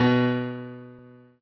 Piano ff 027